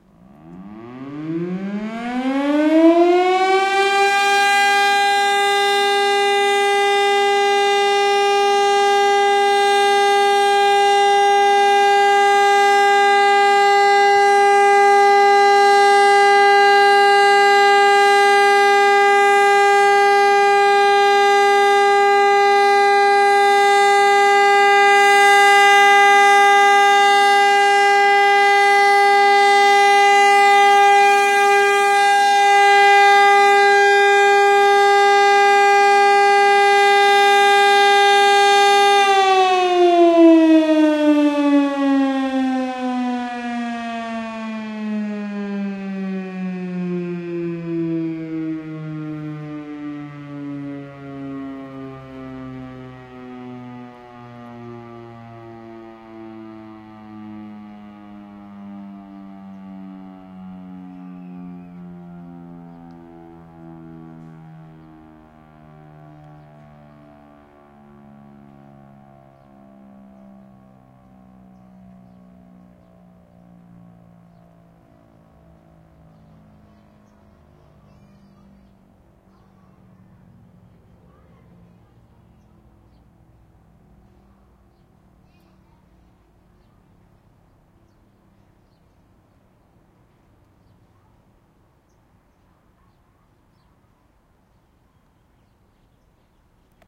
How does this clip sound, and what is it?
Federal Signal STL-10A 480V 3-phase siren located on Robello Lane, behind Honolulu Community College. Siren sounded for the monthly Civil Defense siren test. This recording is better than the one I recorded on 5-1-08 since I recorded this at a park near Kaiulani Elementary School.